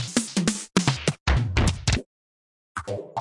A pack of loopable and mixable electronic beats which will loop at APPROXIMATELY 150 bpm. You need to string them together or loop them to get the effect and they were made for a project with a deliberate loose feel.